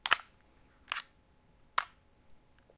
This is from a library of sounds I call "PET Sounds", after the plastic material PET that's mainly used for water bottles. This library contains various sounds/loops created by using waste plastic in an attempt to give this noxious material at least some useful purpose by acoustically "upcycling" it.